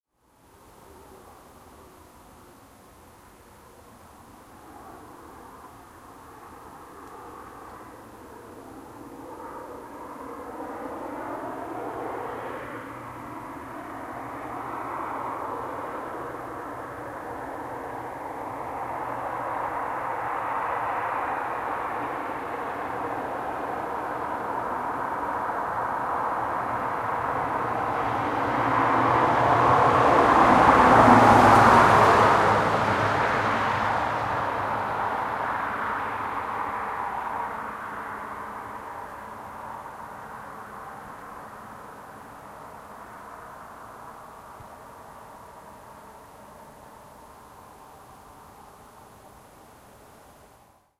0015 Car driveby RL
Car driveby on highway right to left.